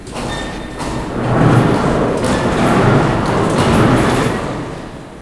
Factory Crane Roll
high, medium, motor, Factory, Industrial, Machinery, machine, low, engine, Rev, electric, Buzz, Mechanical